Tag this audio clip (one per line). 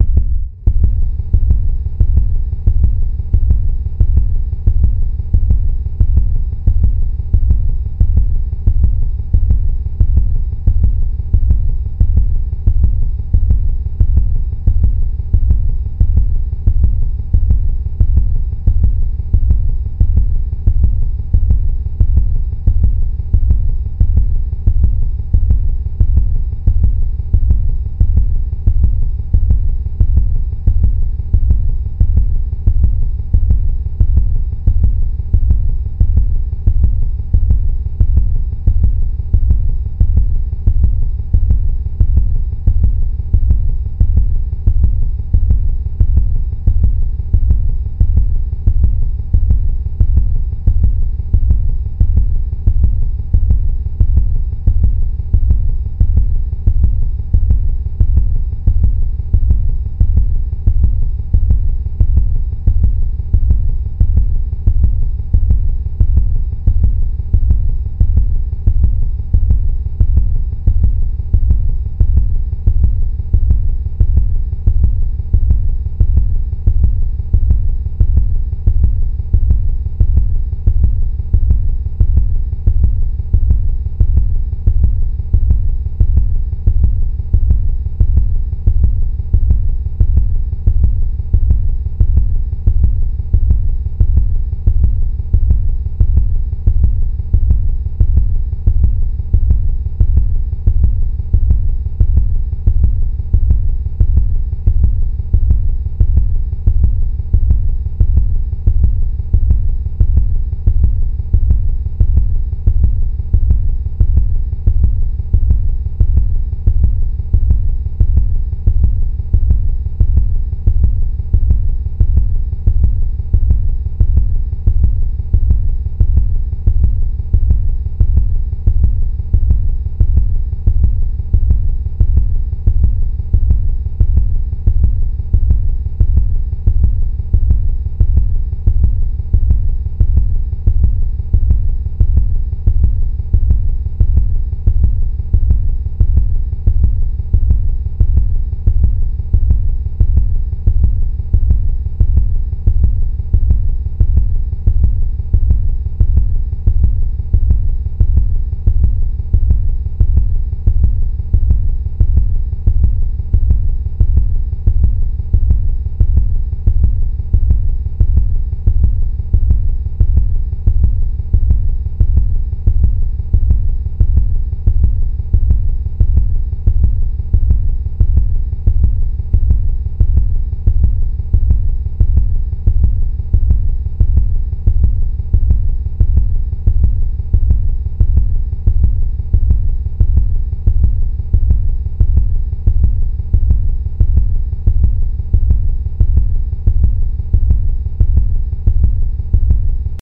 heart
heartbeat
body